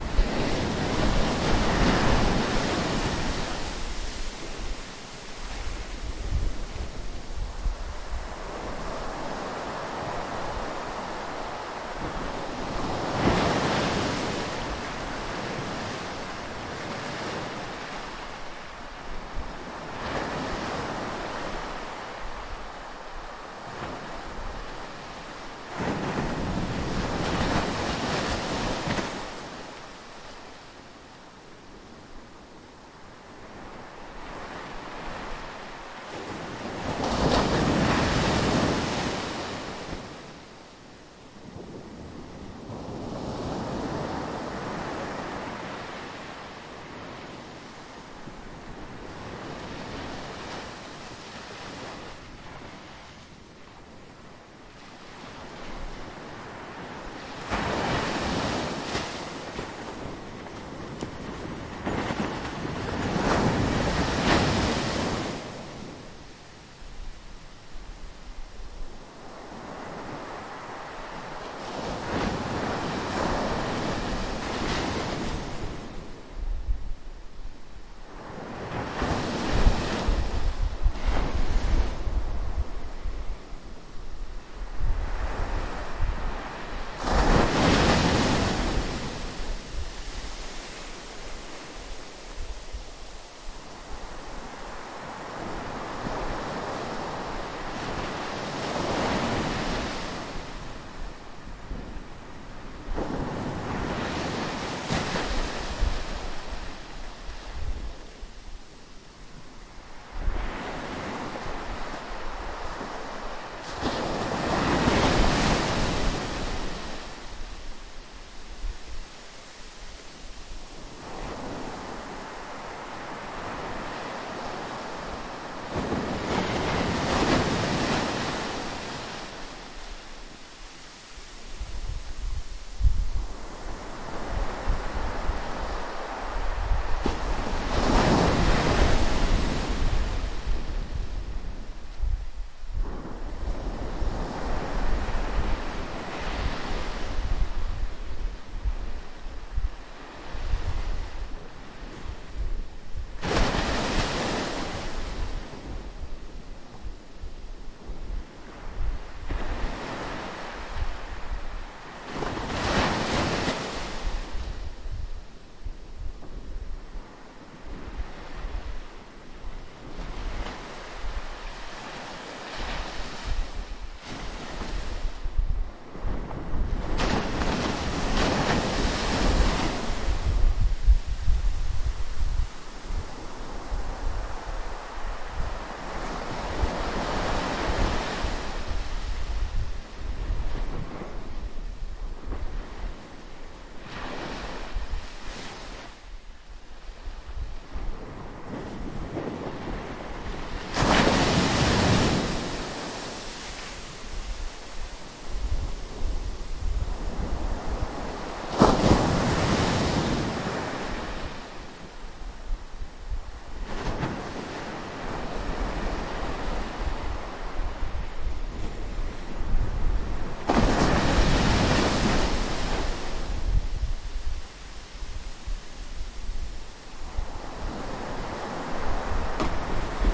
Atlantic waves at sandy beach , ondas do mar na praia, Wellen am Strand
Just waves at the beach.
I´ve just uploaded a new file without low frequency wind noise
coast, Sand, beach, areia, ocean, sea, waves, Sandstrand, Atlantik, Wellen, Stereo, praia, ondas, atlantic, Strand